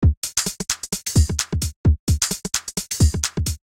swinging garage type loop made with 909 samples in ESX24 2004